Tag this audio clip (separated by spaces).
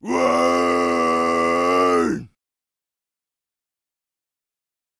voice pitched scream